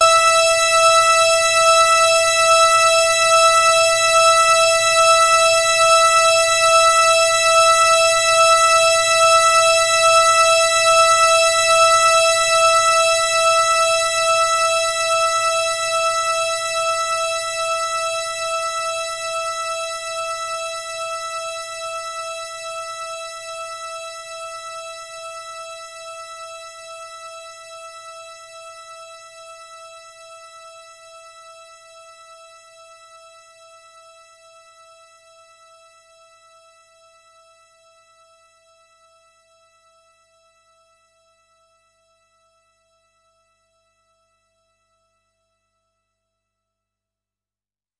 Q saw complexor with extra long relasse E5
This is a complex saw wave like sound with a very long release. The sound is on the key in the name of the file. It is part of the "Q multi 002: saw complexor with extra long release" sample pack.
electronic, multi-sample, saw, synth, waldorf